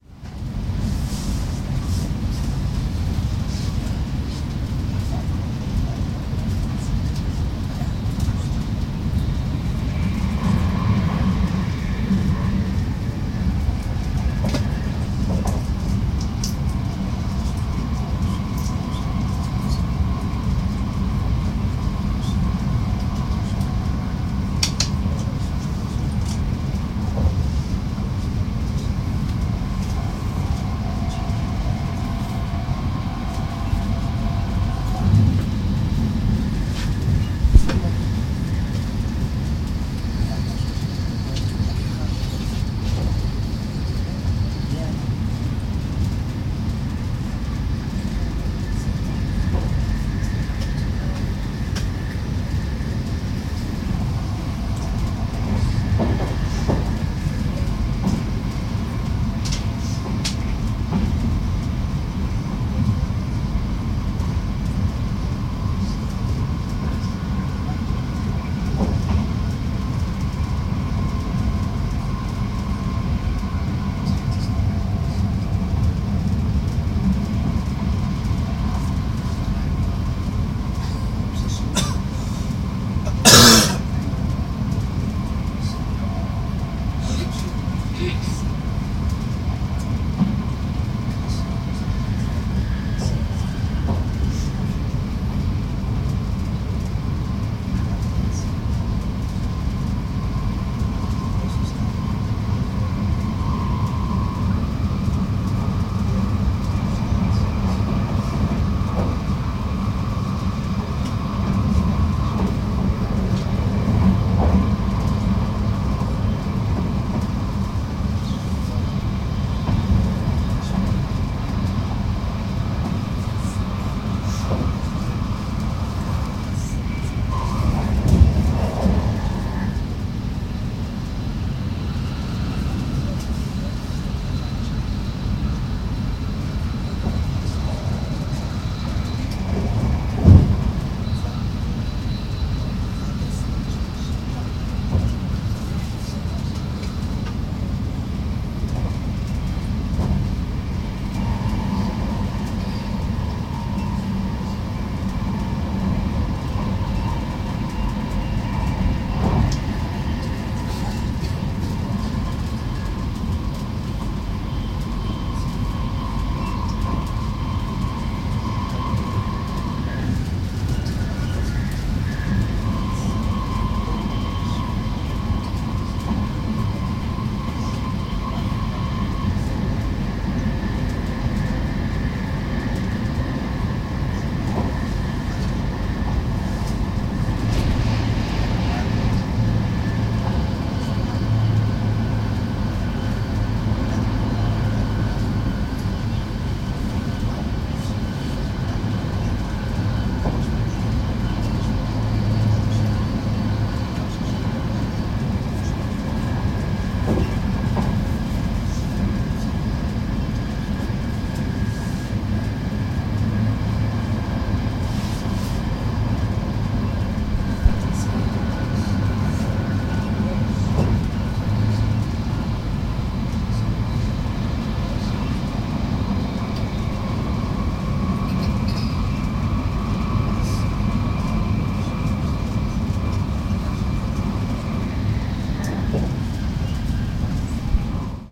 Field recording inside the quiet area on a dutch train.